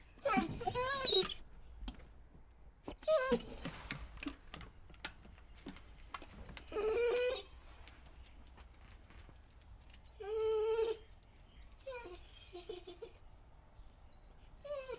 dog whine
Dog whining in suburban backyard